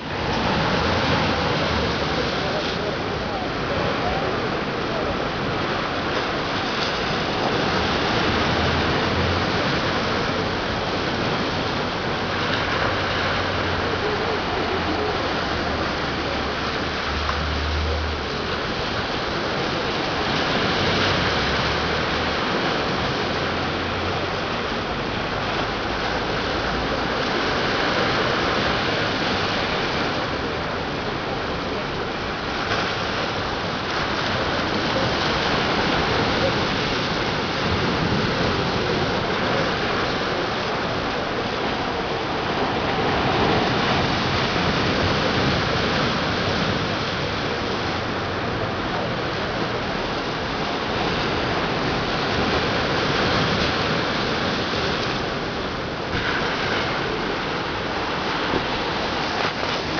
surf on the seafront in Torre San Giovanni, Salento, Italy, recorded on a Canon SX110